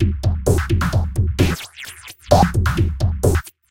Drumloops with heavy effects on it, somewhat IDMish. 130 BPM, but also sounds good played in other speeds. Slicing in ReCycle or some other slicer can also give interesting results.
glitch, effects, processed